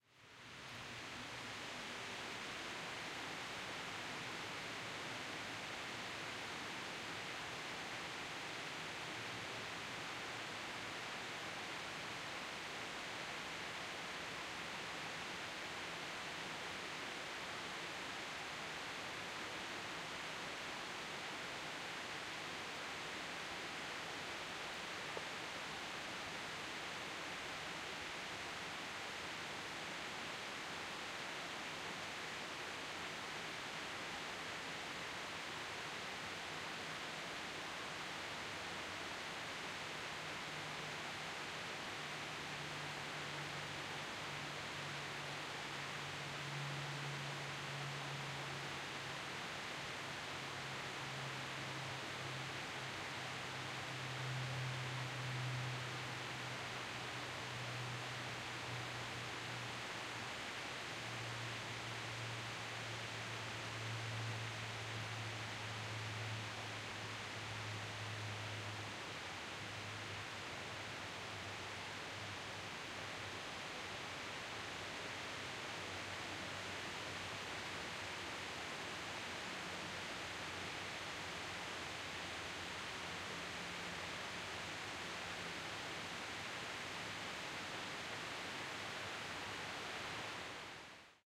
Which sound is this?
09.06.2013: about 15.00. Poznan in Poland. Area of Corpus Christi Churchyard on Bluszczowa street. Noise of rafinary loacated near of churchyard.
Marantz PMD661 MKII + shure VP88 (fade in/out)